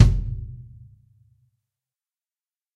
SonorPhonic9PlyBeechRockKitKick24x18
Toms and kicks recorded in stereo from a variety of kits.